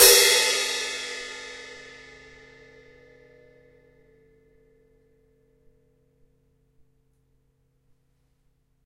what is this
Individual percussive hits recorded live from my Tama Drum Kit